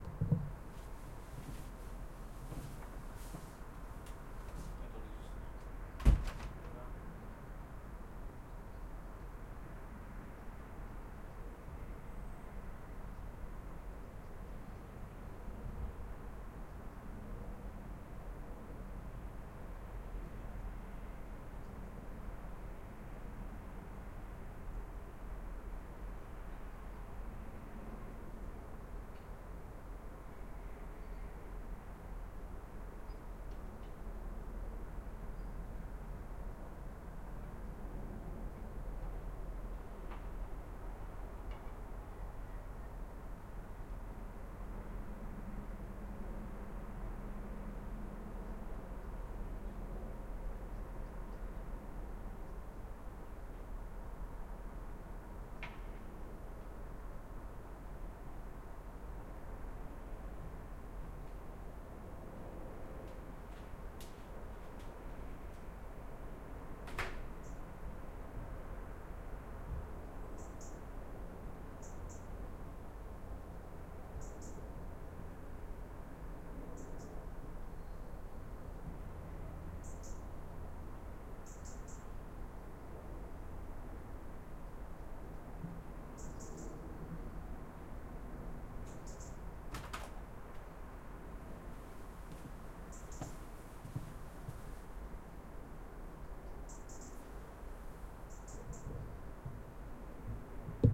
Outside my bedroom, homemade wind filter
ambience, birds, field-recording, windy